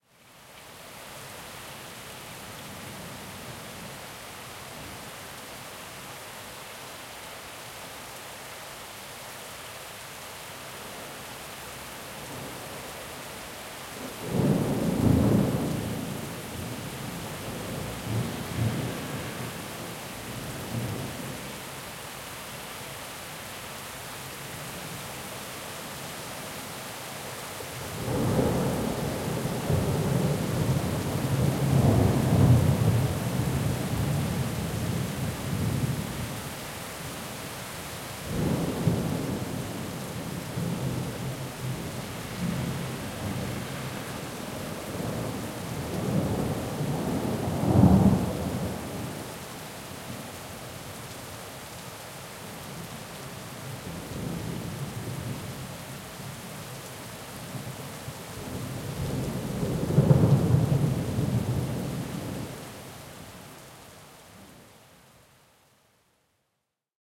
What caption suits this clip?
I found this awesome natural delay effect when i edited my records. If you pay attention, you'll notice the reflection of the lighting what caused the walls of the street.
ZoomH2N _ XY side
Name me if You use it:
Tamás Bohács